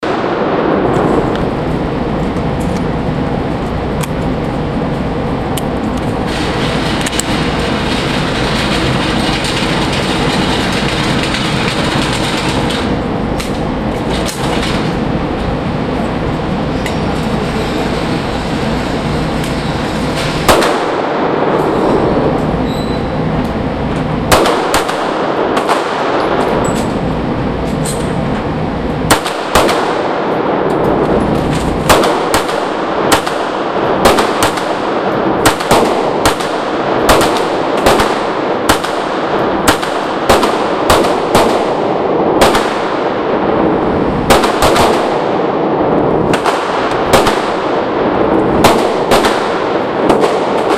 Retracting target, shooting 45 caliber, 9mm, 22 caliber, from